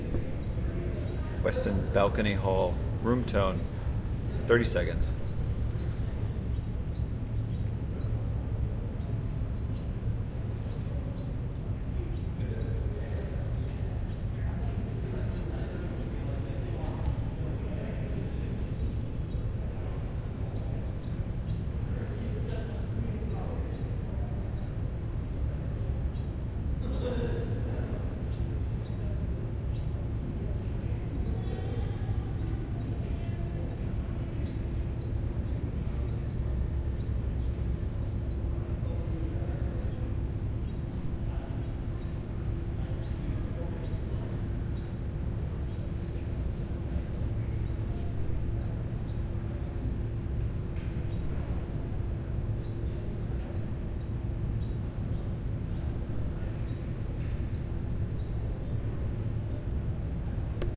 FL-Miami-Westin-Conference-Hallway-Room-tone
FL Miami Westin conference hallway room tone. Zoom H4n
Conference, FL, Hallway, Miami, Room-tone, Westin